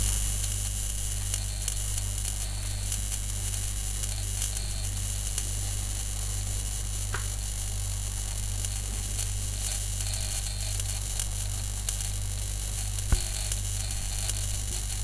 UNSER KÜHLSCHRANK GEÖFFNET - LAMPE HAT WACKELKONTAKT
field-recording, nature